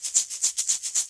Egg Shaker 03
Shaker Percussion Home-made
Home-made, Shaker, Percussion